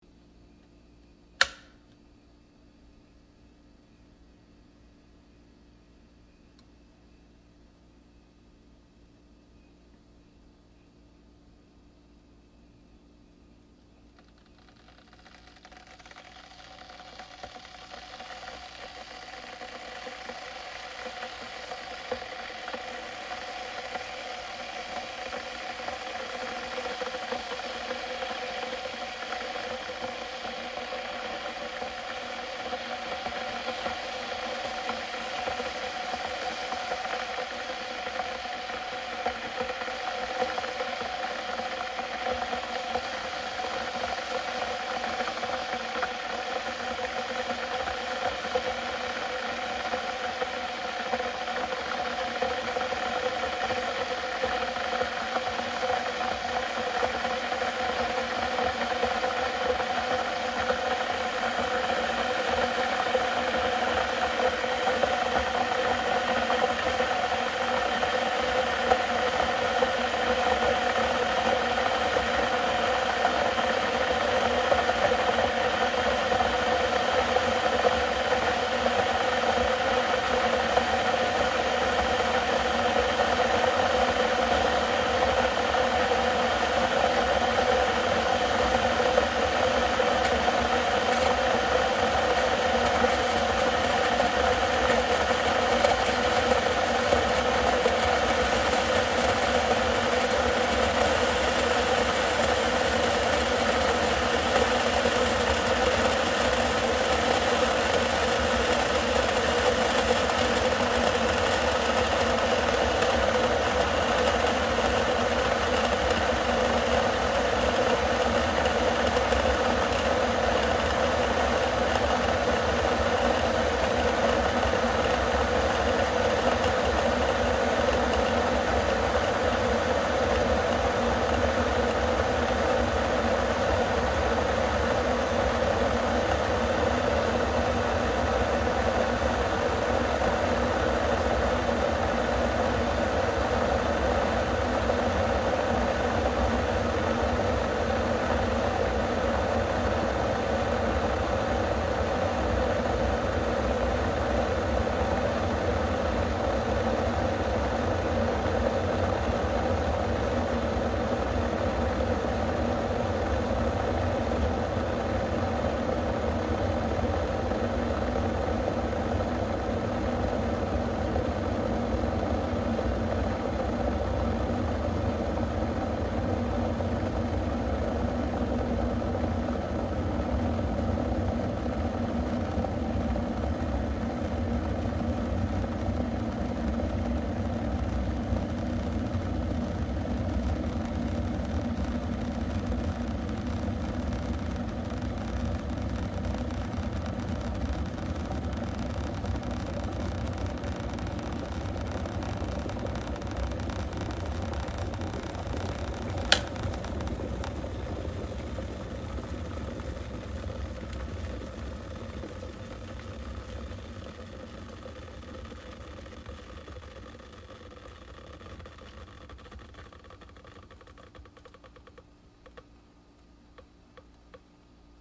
This is the electric water boiler heating up. Recorded with iPhone 8.